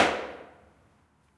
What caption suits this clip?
I stomped my feet in a concrete stairwell.
stamp, stomp, hit, reverb, foot, stairwell, concrete